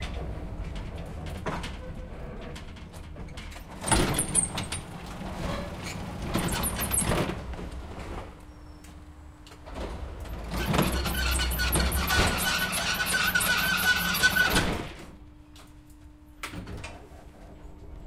Sound of very creaky tram door.
00:03 - 00:07 - opening the door
00:09 - 00:15 - closing the door
Recorded: 2012-10-31 12:30 am.
town, noise, vehicle, creak, city, tram, door